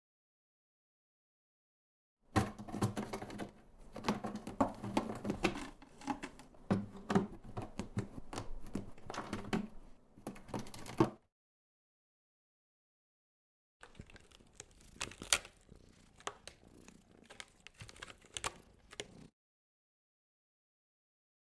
Cat scratching
Cat snoring. The cat has a cold and she is snoring during her sleep.
Recorded with Zoom H6 recorder. The sound wasn't postprocessed.
Recorded close up in a little room at a shelter in Mochov. Suitable for any film.